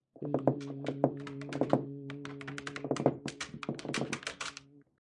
A comic sound of gear grinding.